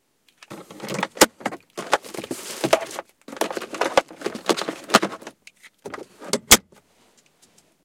20150712 car.glove.compartment
someone opens the glove compartment of a car, seeks around and then closes. Shure WL183 into Fel preamp, PCM M10 recorder
automobile, car, door, driving, field-recording, parking, searching, truck, vehicle